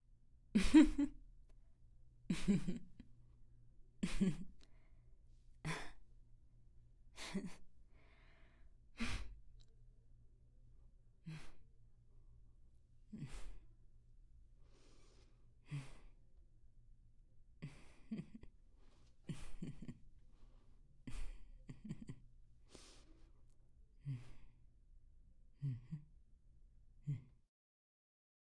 36-Happy murmur
Happy murmur woman
Happy, murmur, woman